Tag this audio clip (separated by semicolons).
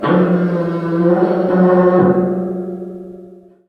alert; audio; ball; big; bizarre; cd; cell; compact; contact; converters; cool; cup; dark; design; disc; dream; dreamlike; echo; edit; enormous; evil; frontier; gigantic; group; hand; hands; huge; impact; impulse; industrial